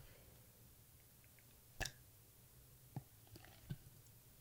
Soup slopping
Slopping some soup in a plastic container. Potato and leek. NTG2
eat, glop, spoon, spot